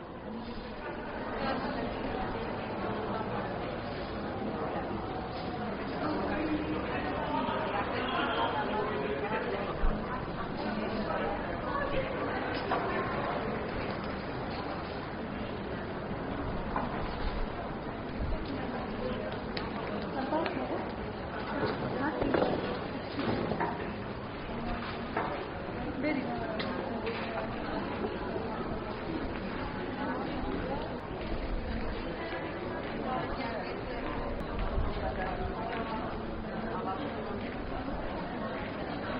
city street cafe outside seating area
outside cafe seating area, some pedestrians passing by
cafe, chat, chatting, crowd, people, restaurant, street, talking